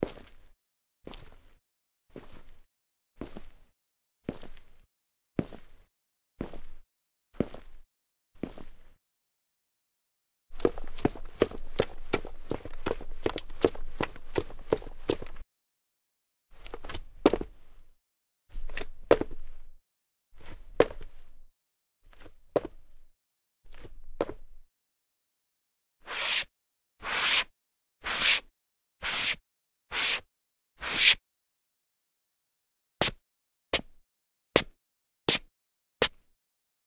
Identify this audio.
Footsteps Mountain Boots Tile Mono
Footsteps sequence on Tile - Mountain Boots - Walk (x9) // Run (x13) // Jump & Land (x5) // Scrape (x6) // Scuff (x5).
Gear : Rode NTG4+
run, tile, boots, scrape, foot, walking, footstep, mountain, scuff, walk, jump, running, Footsteps, land, steps, brick, step